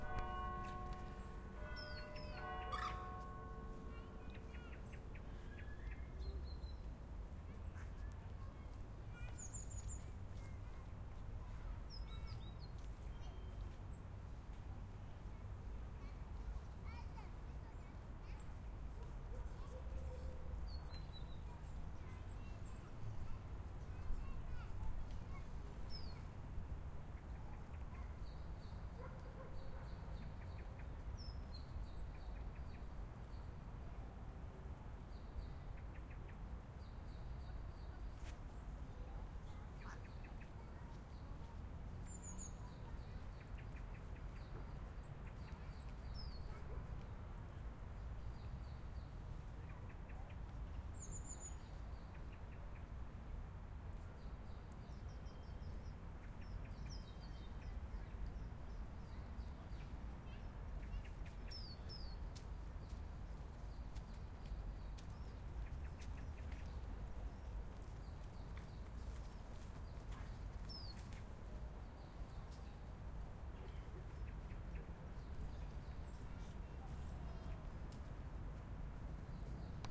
Atmos - Park Sounds
Atmos recording of a city park.
atmos, bells, birds, Glasgow, Park, sounds, T156